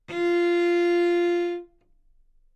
Part of the Good-sounds dataset of monophonic instrumental sounds.
instrument::cello
note::F
octave::4
midi note::53
good-sounds-id::4327
F4, cello, good-sounds, multisample, neumann-U87, single-note